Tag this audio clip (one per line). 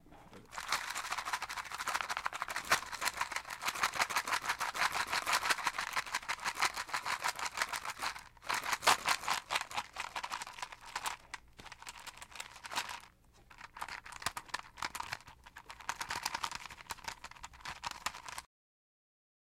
audio-technicaa
cali
diseo-de-medios-interactivos
estudio
revolverdmi